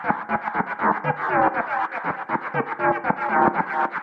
Wierd Loop 006 120bpm
Loops created by cut / copy / splice sections from sounds on the pack Ableton Live 22-Feb-2014.
These are strange loops at 120 bpm. Hopefully someone will find them useful.
loop, rhythmic, delay, strange, 120bpm, seamless-loop, synthesized, synthetic, echo, loopable